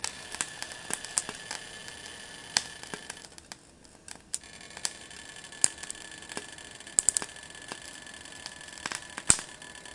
This is cooking a homemade vegan burger in a frying pan with a lid on. The pops are water droplets falling from the lid and hitting the hot oil in the pan. The background noise is in fact my electric stove regulating the heat.
cook, food, pan, stove, sizzle, kitchen, frying